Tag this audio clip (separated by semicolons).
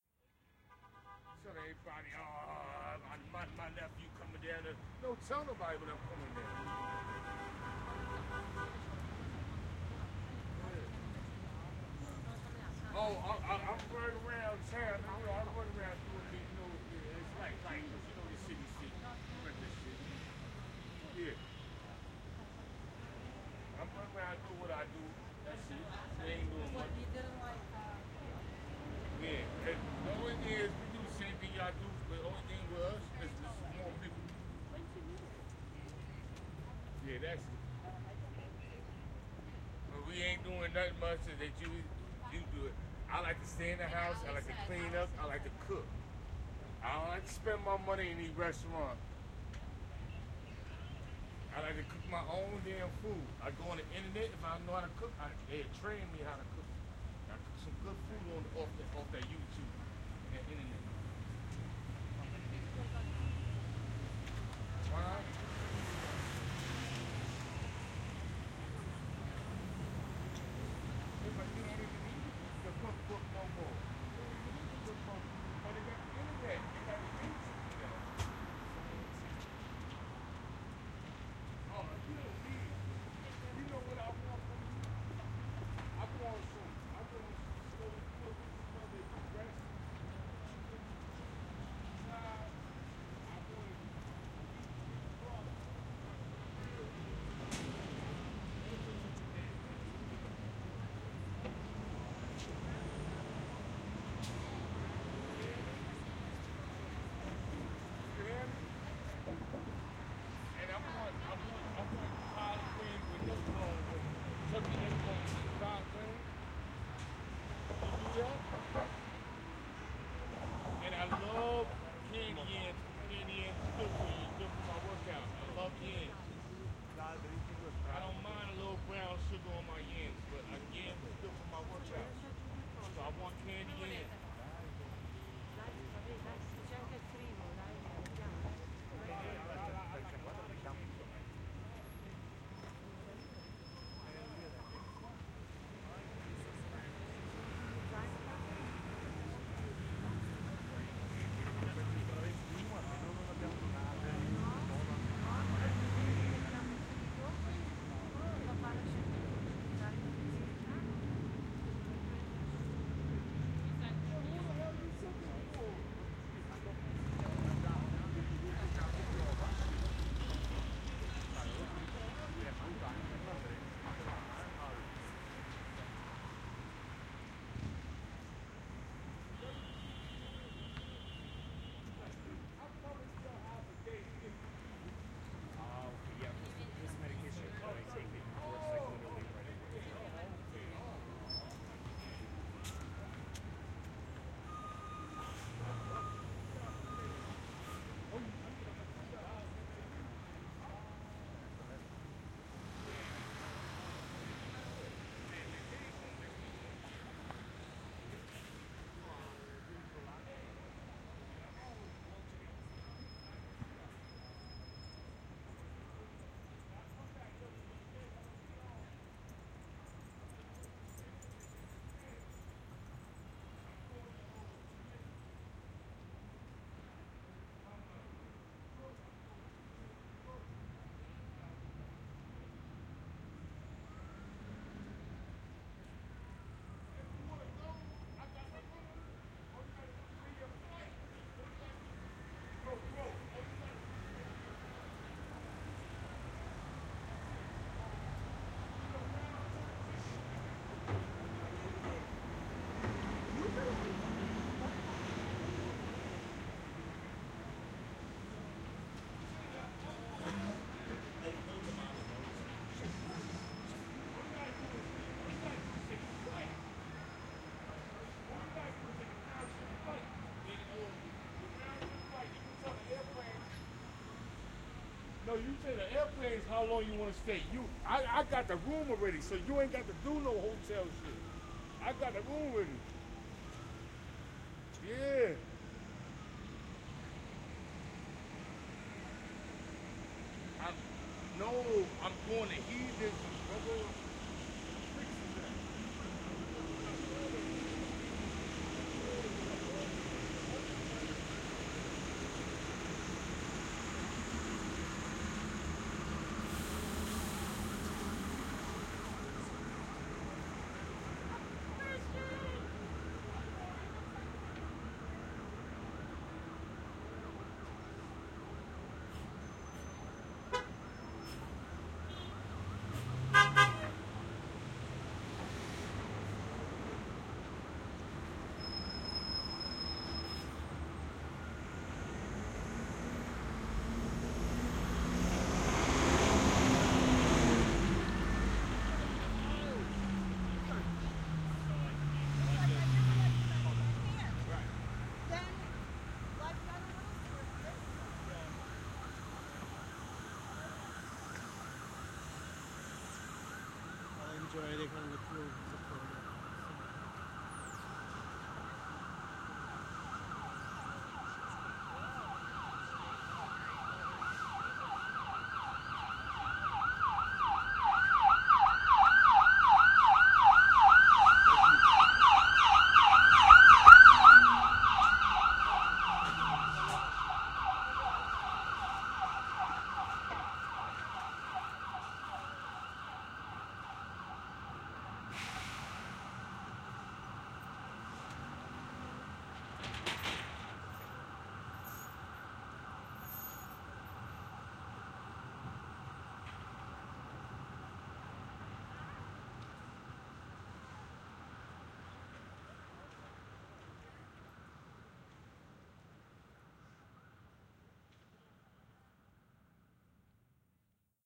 people; ambience; street; street-recording; traffic; binaural; field-recording; nyc; city; siren